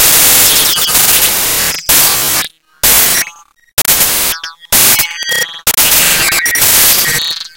DEF - Bleilop
Synthetic "digital zipper"-type sound. Movement across the stereo field. Loops well with the distortion occurring on the beat.
digital, distortion, error, industrial